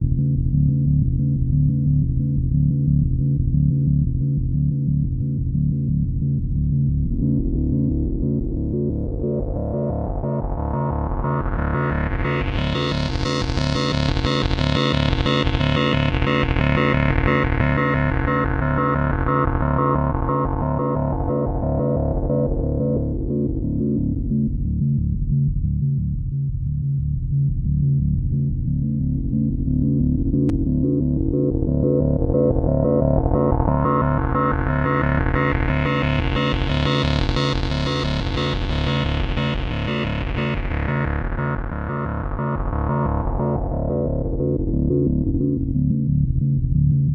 repetitive sound kinda spooky